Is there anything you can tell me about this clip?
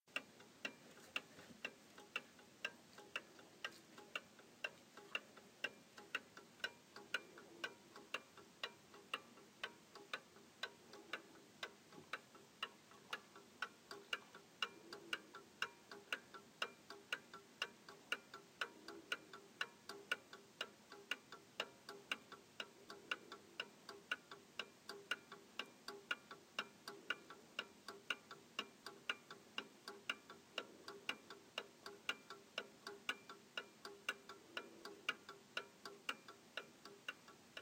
antique clock tick
My grandfather's antique Seth Thomas mantle clock.
ticking, tick-tock, grandfather, pendulum, tic-tok, clock, antique, antique-clock, mechanism, old-clock, clockwork, timepiece-ambience, tic, mantle-clock, time, clock-ticking, clock-tick, tick, tok, ticks, old